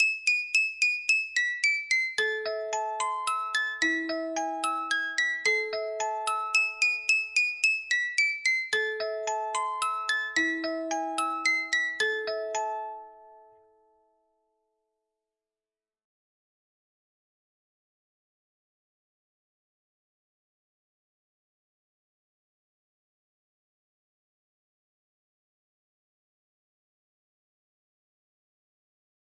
Music Box Playing Fur Elise
antique, box, classical, hand-operated, historical, instrumental, jingle, mechanical, mechanical-instrument, mechanism, melancholic, melancholy, metallic, music, musical, musical-box, music-box, musicbox, old, sound-museum, wind-up